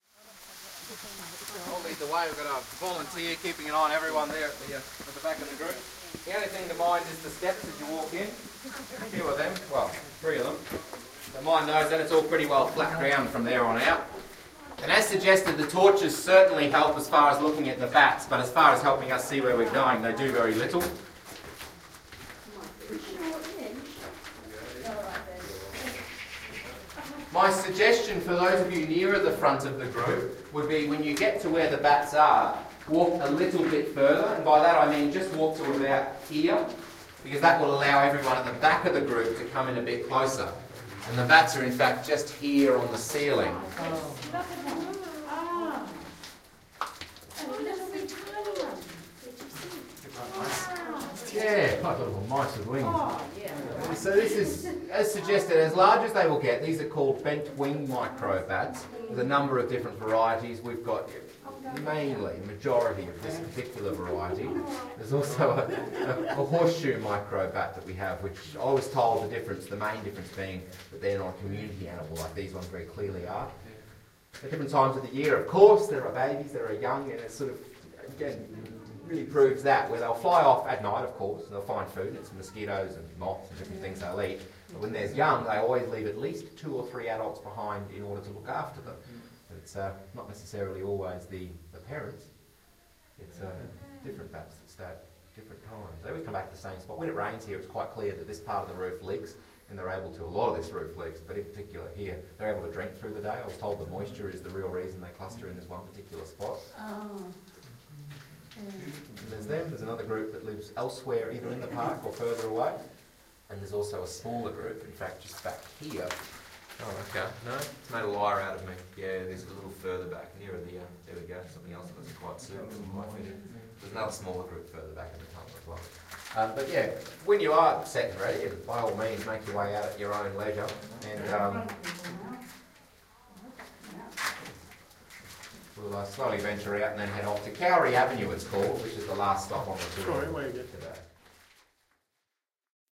Paronella Park - Enter The Bat Cave
Tour guide (Luke) takes a group of about 8 people into a tunnel and shows them some bats on the ceiling. Luke was a great guide with a well developed, classic, Australian accent.
australian-accent, bat-cave, binaural, cave, cavern, description-of-bats, field-recording, human, male, man, paronella-park, tour, tunnel, voice